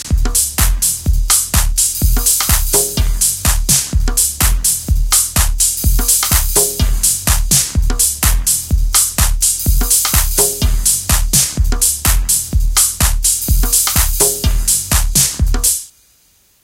Distorted Techno House Loop
Loop made in FL11, recorded to a tape and digitized back thru year 2000 Echo Gina24 audio interface.
distorted-loop, tape-saturation